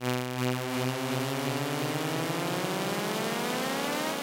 chargeup1-chiptone
8-bit, chippy, chiptone